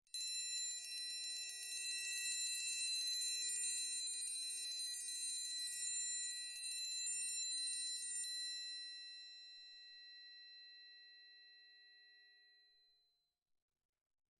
Triangle Ringing fast
high, triangle, music, ringing